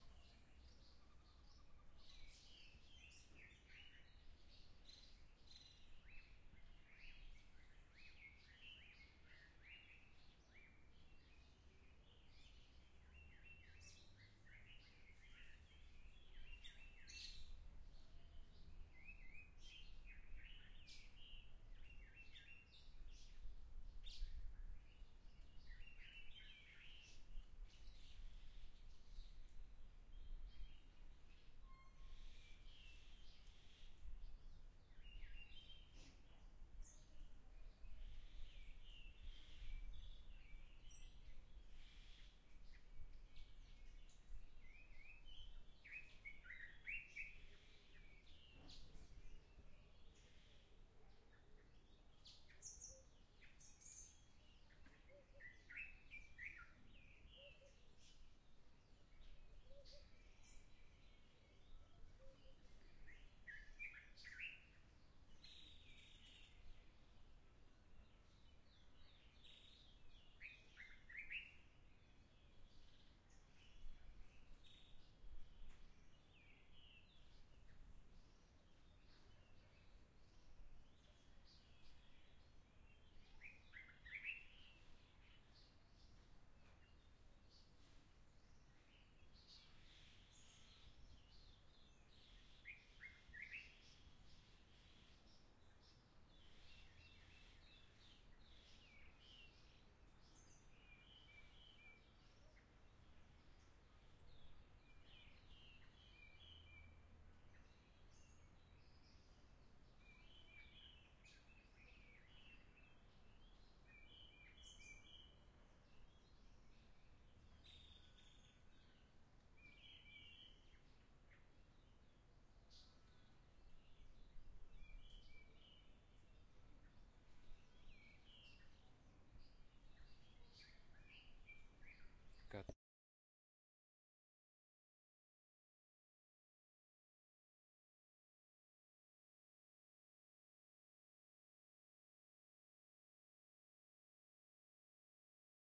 Forrest Ambience
This was recorded on school property, a location filled with trees and accompanied by soft winds.
Nature, Forrest, OWI, naturesounds, morning